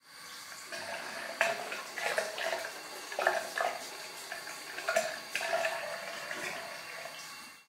Some water from a tap recorded on DAT (Tascam DAP-1) with a Sennheiser ME66 by G de Courtivron.
tap, water